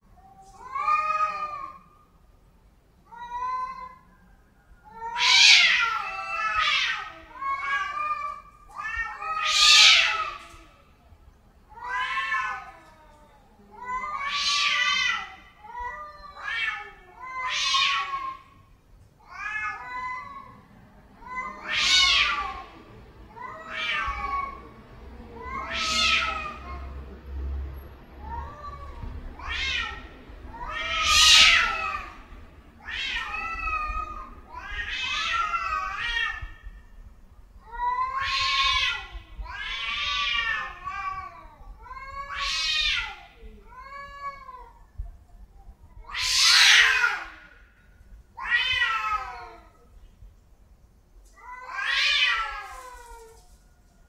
Gatos no cio
Dois gatos no telhado do vizinho gemendo alto às 5h.